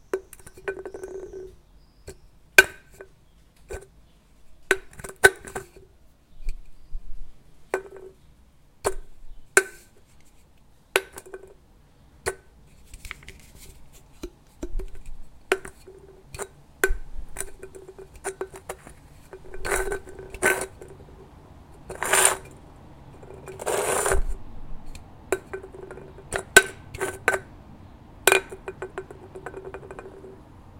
Tin Can playing
Tin Can: playing with tin-can, tin-can rolling, tin-can fall,
metal, can, tin, bin